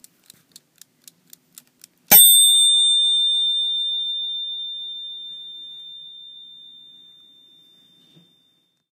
Kitchen Timer — Done!
Six or so ticks, then a single Ding! as the timer goes off. Timer removed from an Infra Chef halogen oven during repair.
Recorded with "Voice Notes" on an iPhone and edited with "Amadeus Pro" on a Mac, but don't hate it for that.
bell, ding, field-recording, kitchen, timer